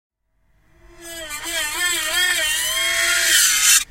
bad dental plan
recordings of a grand piano, undergoing abuse with dry ice on the strings
ice, abuse, dry, screech, piano, scratch, torture